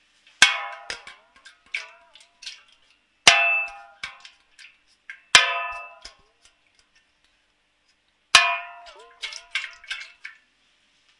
a Noise on a breakwater